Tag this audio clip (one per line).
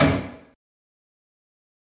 Clock
loud
ticking